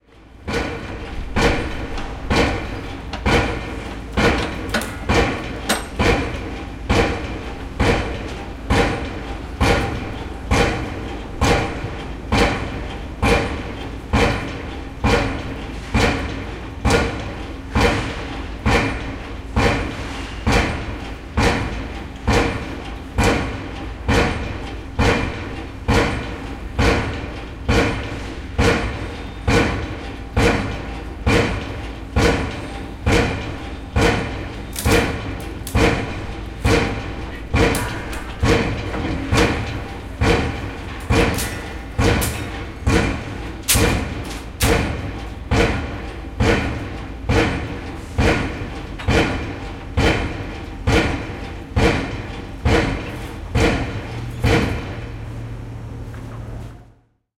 110817- piledriver in randers1
17.08.2011: eighteenth day of ethnographic research about truck drivers culture. Renders in Denmark. The river port in the center of Renders. Unbelievable noisy and beautiful sound of piledrivers and drill. During the unload some steel staff.